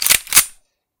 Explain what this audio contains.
Here's a sound to go with the last one. Please enjoy. Recorded using a H4NPro in doors. Mixed in Audacity.